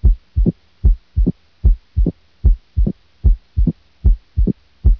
cardiac pulmonary Sounds
sounds for medical studies